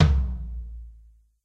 Rick DRUM TOM LO hard
Tom lo hard
rick, stereo